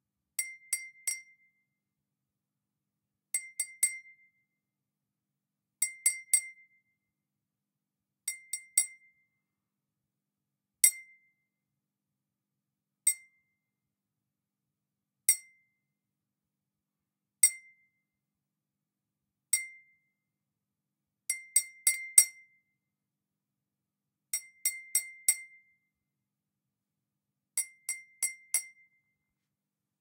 Glass Knock Ding Cutlery Fork Dinner Pack
Breaking-Glass, Broken, Cleaning, Clink, Clinking, Crack, Cracking, Crash, Cutlery, Ding, Dinner, Dong, Foley, Fork, Glass, Glass-Bowel, Glass-Cup, Hit, Jar, Knife, Knock, Metal, Shattering, Spoon, Table